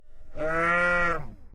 Reverse Sheep Bah 01
I took the sheep bleat and reverse it for the use of creating creature sound effects.
Bleat, Sheep, Reverse